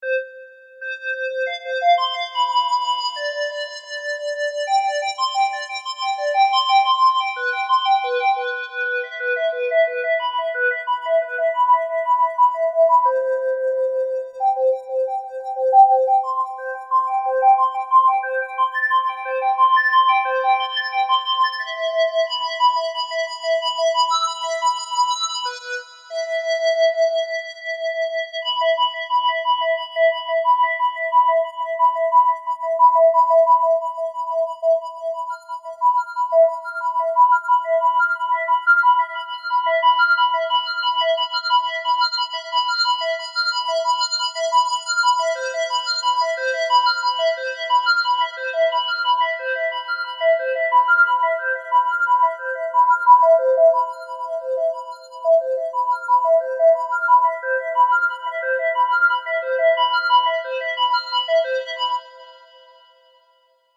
Fluting stars
Is the star or an alien behind it that makes this jolly sound?
alien, ambient, space